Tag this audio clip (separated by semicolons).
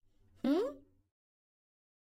Voice; Woman; Foley